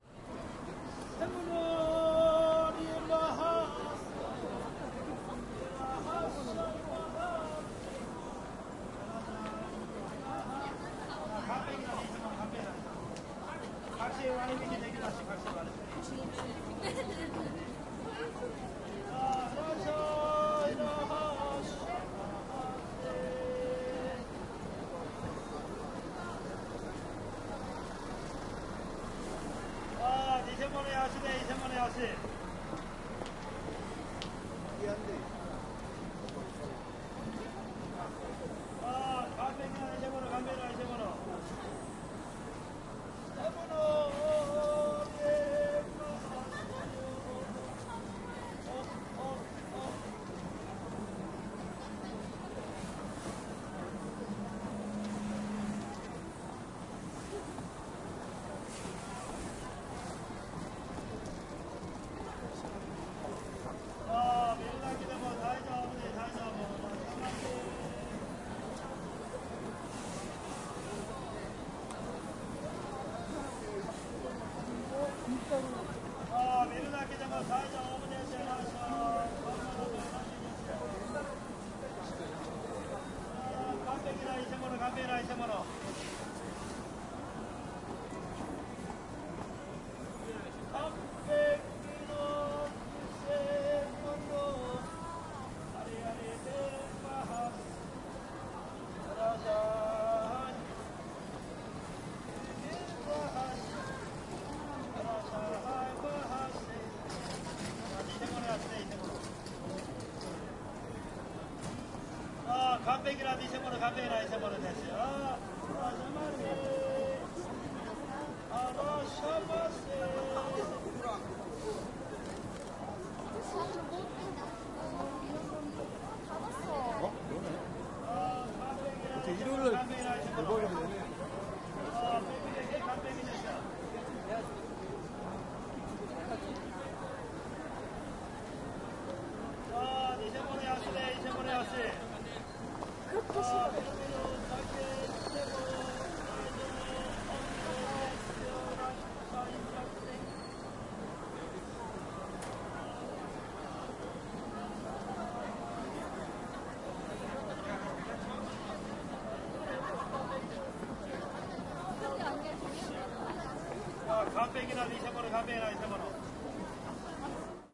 0162 Seller shopping street
Roasted chestnuts. Shopping street people walking talking Korean.
20120212
seoul, voice